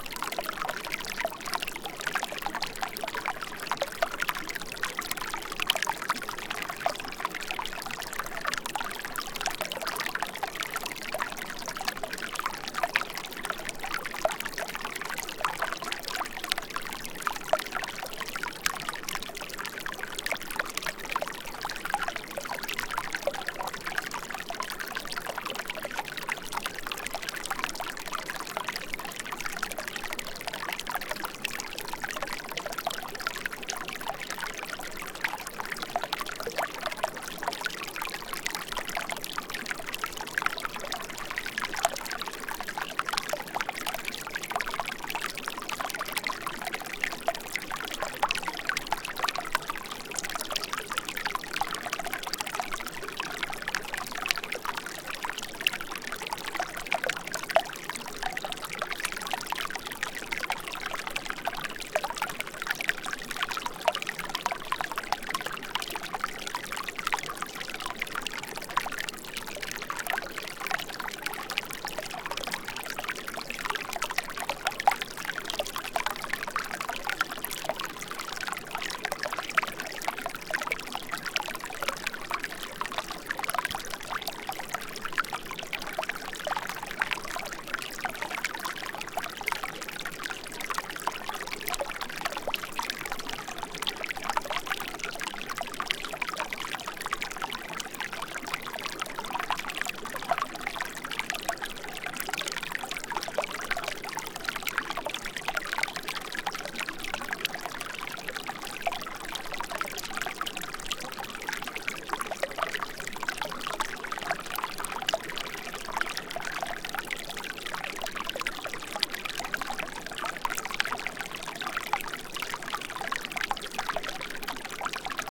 broken top creek 16
One in a series of small streams I recorded while backpacking for a few days around a volcano known as Broken Top in central Oregon. Each one has a somewhat unique character and came from small un-named streams or creeks, so the filename is simply organizational. There has been minimal editing, only some cuts to remove handling noise or wind. Recorded with an AT4021 mic into a modified Marantz PMD 661.
ambient, babbling, brook, creek, field-recording, gurgle, liquid, relaxing, river, splash, stream, trickle, water